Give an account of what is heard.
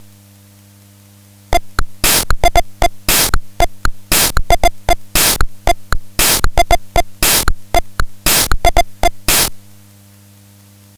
Casio pt-1 "rock 2" drum pattern
80s, retro, drumloop, casio, loop, pt1, rock